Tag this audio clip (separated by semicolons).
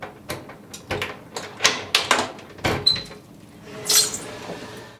deadbolt; door-opening; squeak